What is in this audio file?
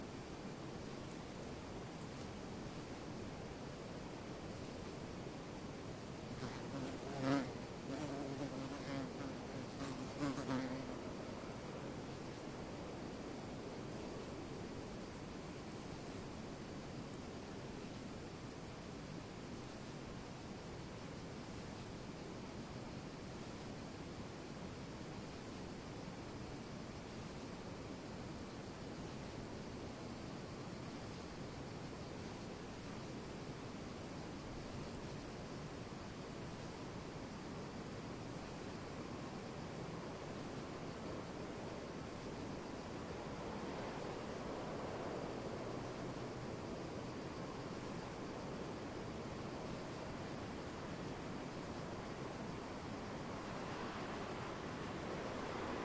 Surround recording of a hiking track in the Biokovo Mountain National Park in Croatia.
This recording was done from a higer position than the other one, it is somewhat mor wide-angle and features more wind and less cricketts.
Good, clean mediterranian summer-atmo, ideal for motion-picture or broadcast work.
It is noon, very hot and sunny and millions of crickets are chirping. In the distance, some goat-bells can be heard, otherwise the place is deserted.
In the middle of the recording, a bumble-bee flies by the mics.
Recorded with a Zoom H2.
This file contains the rear channels, recorded with 120° dispersion.
atmo, barren, bee, biokovo, bumble, crickets, field-recording, hot, national, nature, park, solitary, summer, wide-angle, wilderness